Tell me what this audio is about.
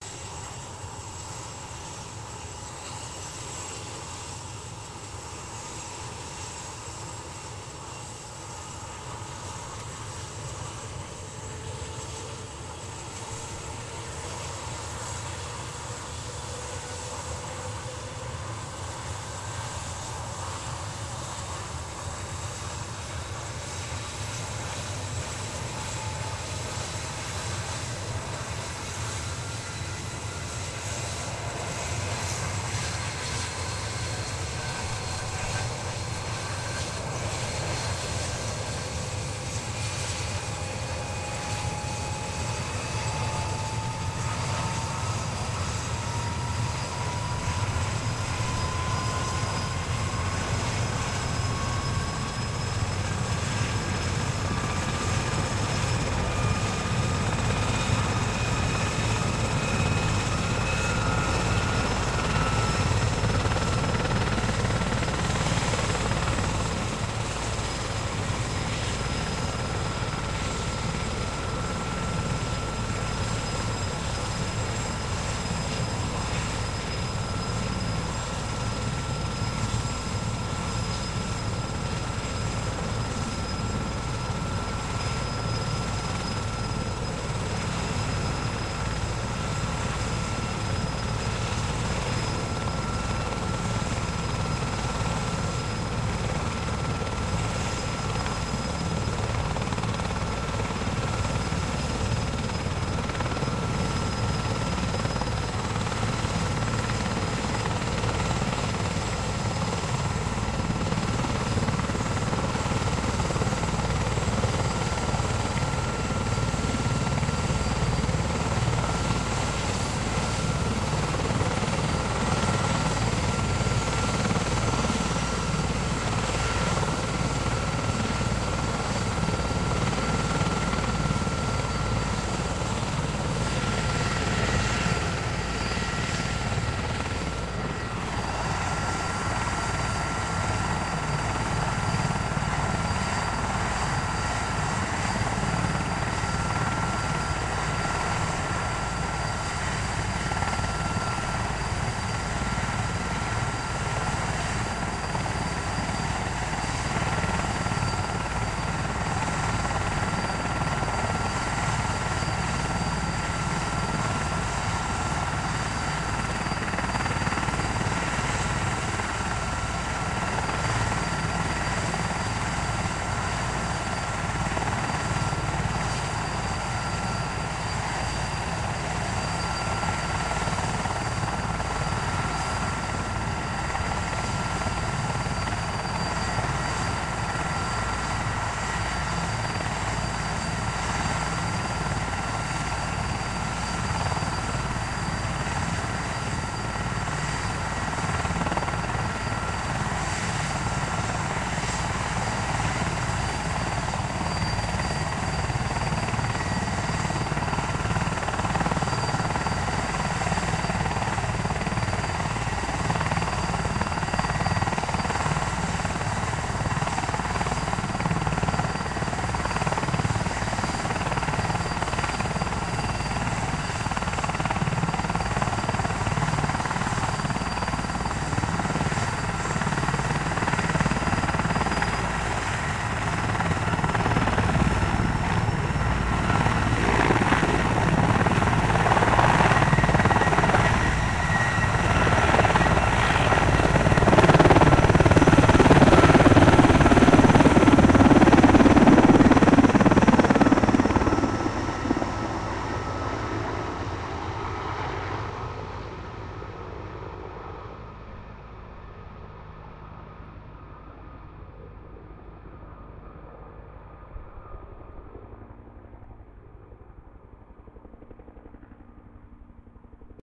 This is the warm up then lift off of an Erickson Sky Crane. It was operating out of the central Cascade mountains in Washington state, in the US. This helicopter is for fire fighting, and can carry 3,000 gallons of water or retardant to a fire - it's enormous. There are only 31 Sky Cranes in existence, and in August of 2018 we have two of them fighting fires in our surrounding mountains.
chopper, fire, helicopter, helo, methow, turbine, winthrop